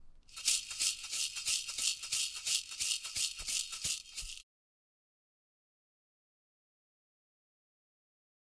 OWI Coffee bean shaking
shaking a large coffee jar
coffee, jar, shake, shaked, shaking